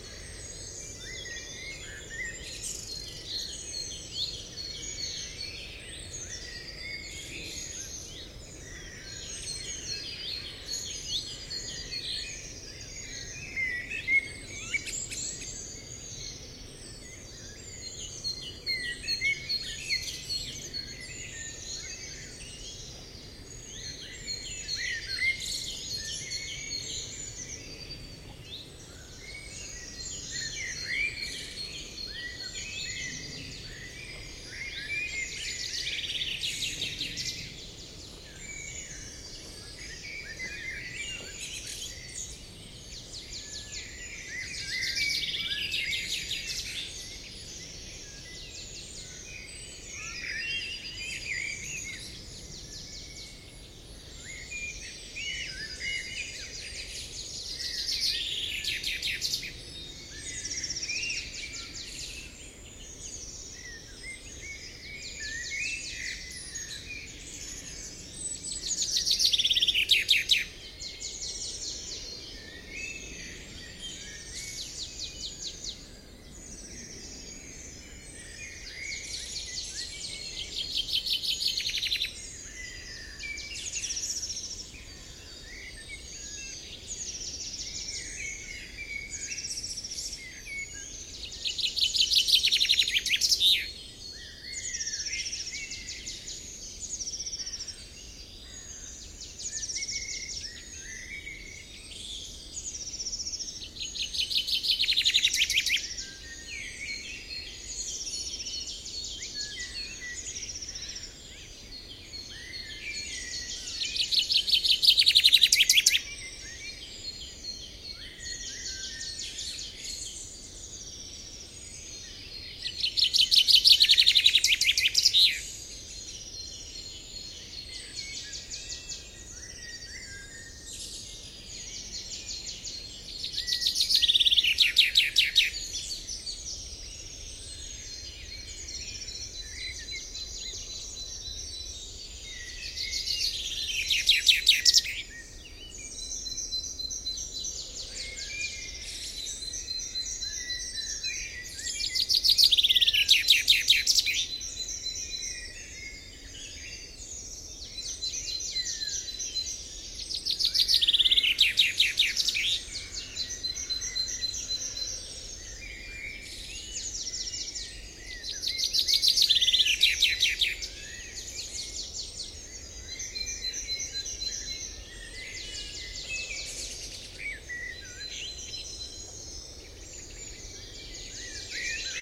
Early sunday morning bird concert in a natural forest north of Cologne, Germany, shortly before sunrise. At the end of the recording a chaffinch (fringilla coelebs) singing close to the mic. Vivanco EM35 with preamp into Marantz PMD 671.